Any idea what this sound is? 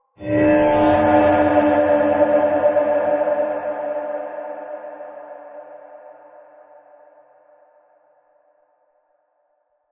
Dark Texture 3
Sound for Intro to your movie or game horror story.
Enjoy! It's all free!
Thanks for use!